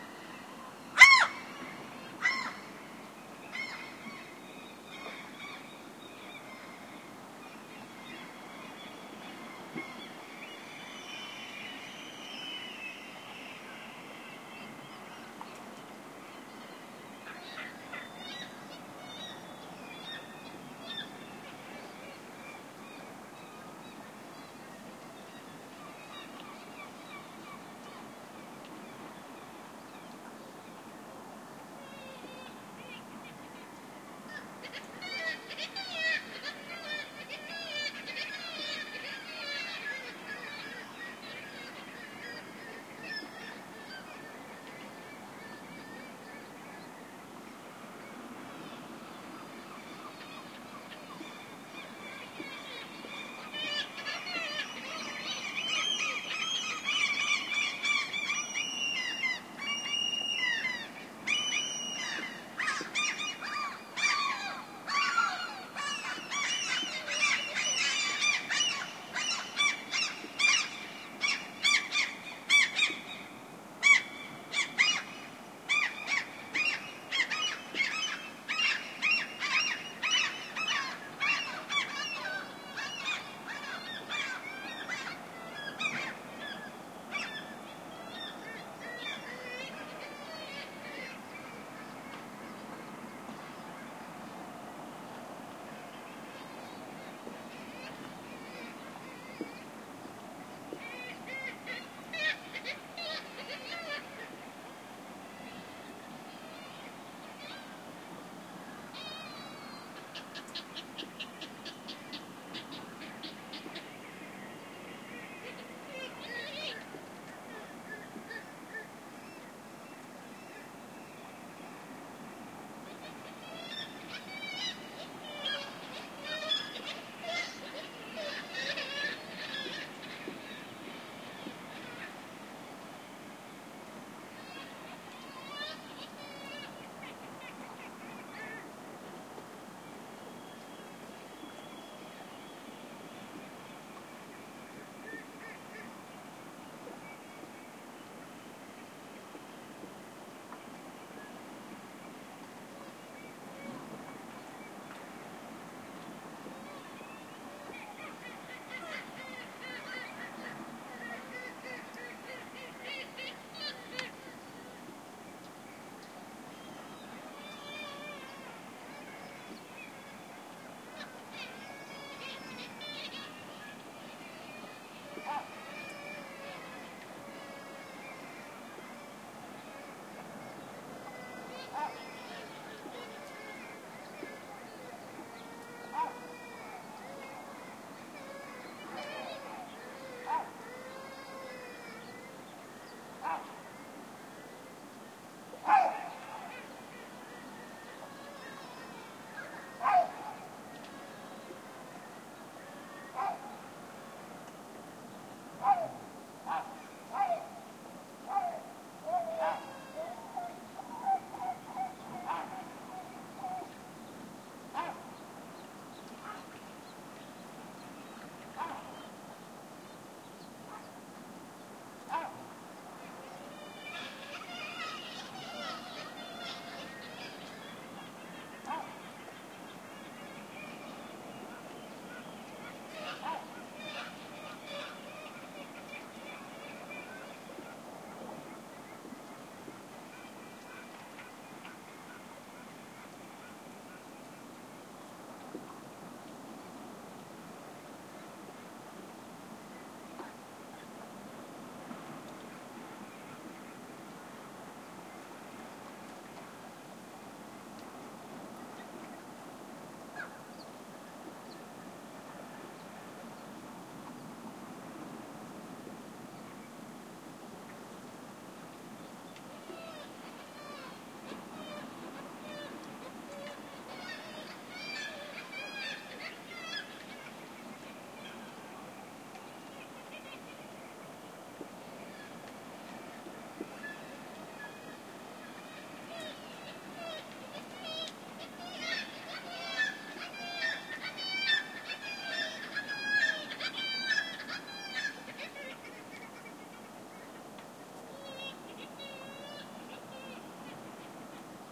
Nocturnal take of seagull screeching calls, recorded at Andenes, Norway. Primo EM172 capsules inside widscreens, FEL Microphone Amplifier BMA2, PCM-M10 recorder